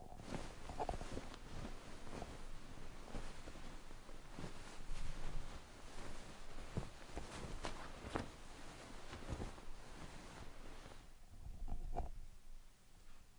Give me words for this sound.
Recorded with Rode VideomicNTG. Raw sound so you can edit as you please. Me moving sheets on a bed to mimic the sound they make ... when one moves during his sleep or jumps out of bed.
bed, sheets, sheet, moving-sheets